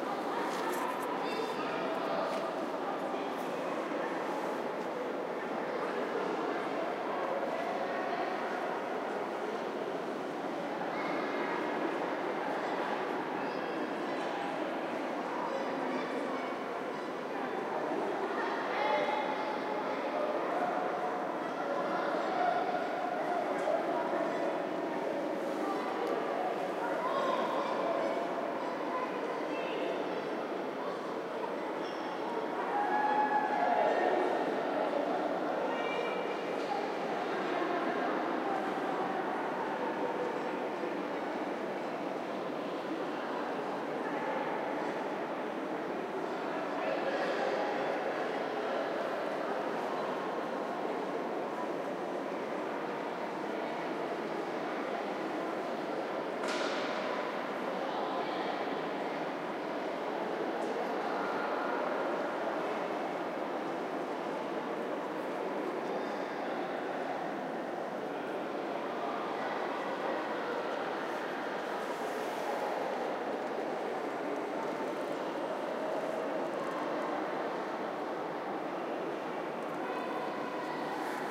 Stereo recording made in the Generator Hall of Tate Modern between exhibitions. The end of the Hall furthest from the entrance was empty, so I ran the recorder for a couple of minutes just to sample the reverberation. Because it is a school holiday in the UK, there were large numbers of children in the gallery, especially on the upper deck bridge about 25m from the recorder, and their voices are higher pitched and their behaviour extrovert. The space is large, so the echoes give a lot of atmosphere.
Recorded on Zoom H2. Transferred to Audacity and high pass filter with breakpoint of 180Hz used (12dB/octave) to remove the hum that is prevalent at this site. +6dB of amplification used, so peaks at -18dBfs.